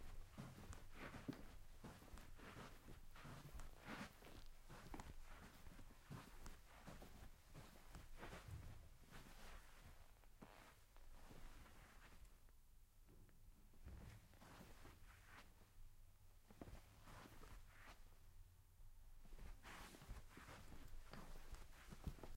walking-on-carpet
foot steps walking on a carpet
a, carpet, foot, steps, walking